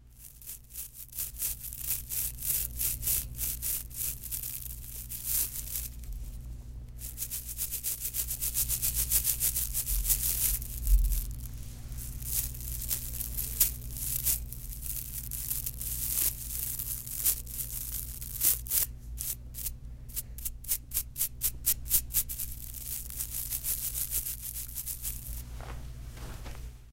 Rubbing tooth brushes against each other, recorded with Neumann TLM103
brush, brushes, friction, rubbing